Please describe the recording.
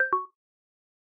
Beep 05 Negative
a user interface sound for a game